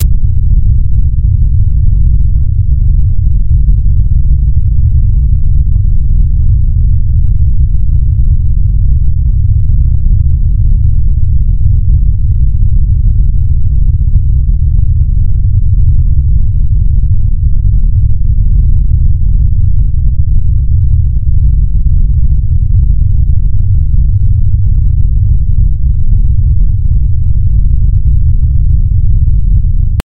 This kind of noise generates sinusoidally interpolated random values at a certain frequency. In this example the frequency is 200Hz.The algorithm for this noise was created two years ago by myself in C++, as an imitation of noise generators in SuperCollider 2.